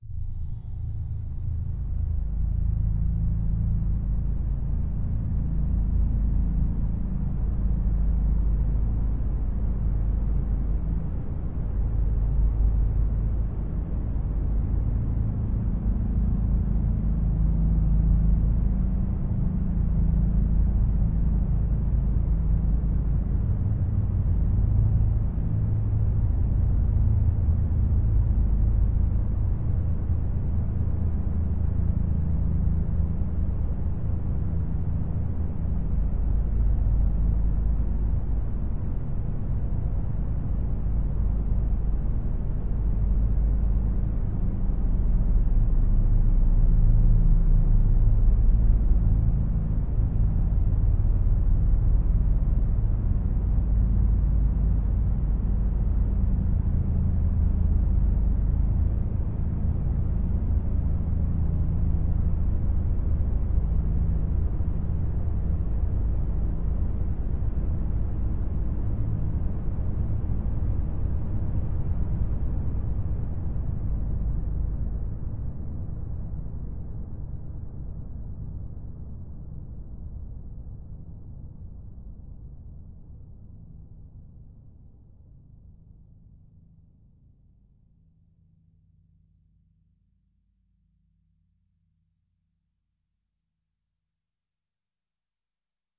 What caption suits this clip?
LAYERS 015 - CHOROID PADDO- (2)
LAYERS 015 - CHOROID PADDO is an extensive multisample package containing 128 samples. The numbers are equivalent to chromatic key assignment covering a complete MIDI keyboard (128 keys). The sound of CHOROID PADDO is one of a beautiful PAD. Each sample is more than minute long and is very useful as a nice PAD sound. All samples have a very long sustain phase so no looping is necessary in your favourite samples. It was created layering various VST instruments: Ironhead-Bash, Sontarium, Vember Audio's Surge, Waldorf A1 plus some convolution (Voxengo's Pristine Space is my favourite).
ambient, multisample, drone, pad